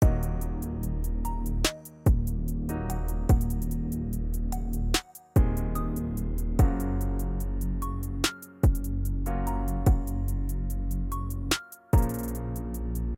Emotional Trap, Trap, Cinematic 146 BPM A# minor Full Mix Audio loop
Emotional Trap, Trap, Cinematic_146 BPM